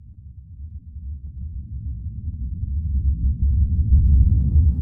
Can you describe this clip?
Reverse Dramatic Bass Hit
The sound of a dramatic bass hit, reversed.
dramatic, hit, reverse